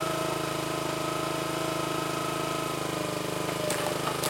engine-idle
small honda engine on wood splitter idles.Tascam DR-40
engine, lawn, log, mower, pull, small, split, splitter, start, wood